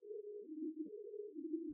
siren-02-ambulance
It's a little sound effect like you can hear from many rescue vehicules, more frequently in Europe I guess, but it's here for you.
To use in a loop for better effect.
This sound made with LMMS is good for short movies.
I hope you to enjoy this, if you need some variant I can make it for you, just ask me.
---------- TECHNICAL ----------
Common:
- Duration: 1 sec 718 ms
- MIME type: audio/vorbis
- Endianness: Little endian
Audio:
- Channel: stereo
police, 911, samu, firetruck, car, ambulance, europe, horn, traffic, emergency, fire, injured, warning, sirens, siren, pompier, vehicule, danger, rescue, hurt, alarm